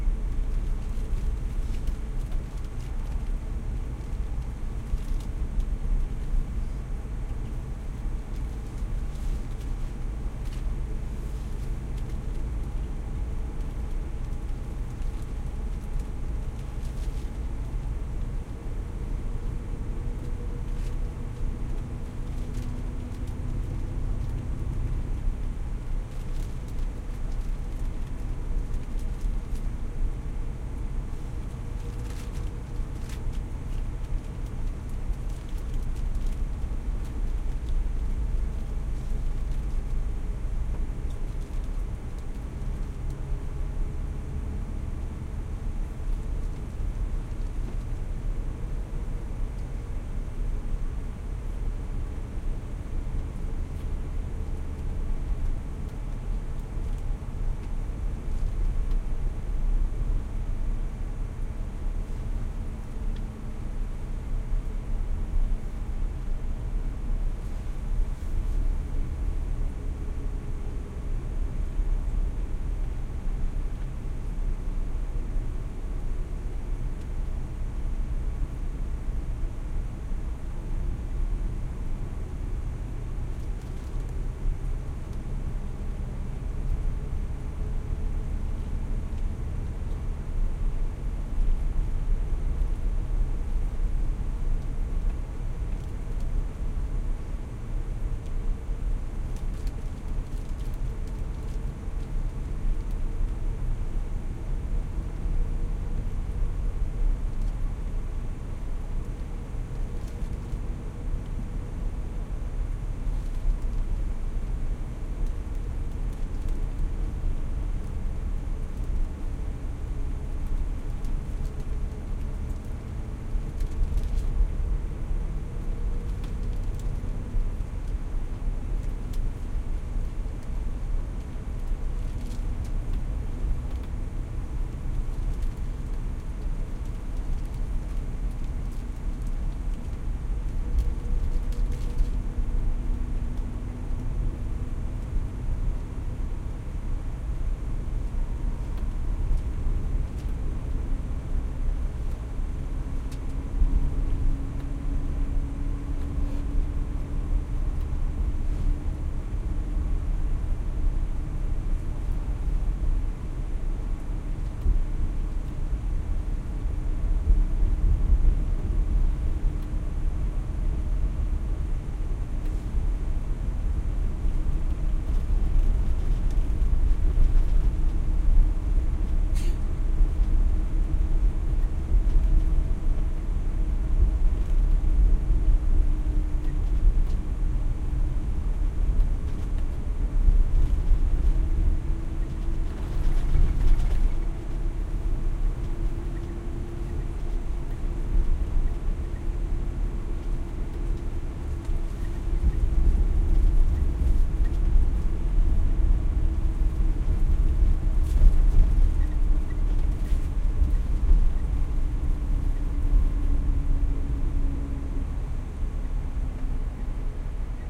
school bus or coach truck int driving medium speed4
speed, or, truck, school, bus, driving, medium, int, coach